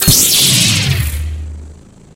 Synthetic Sound Design, created for an Indie Game
Credits: Sabian Hibbs : Sound Designer
Light Saber Sounds